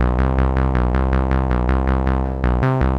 Even more loops made with software synth and drum machine and mastered in cool edit. Tempo and instrument indicated in file name and or tags. Some are perfectly edited and some are not.
80, bass, bpm, loop, synth